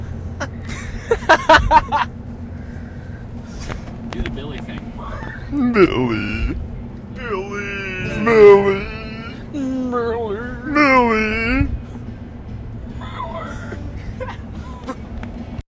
"Laugh" do the Billy thing.
me and friends driving around talking about Billy